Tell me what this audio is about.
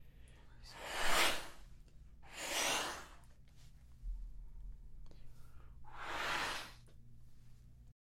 audio de foley para animation
effect, application, sound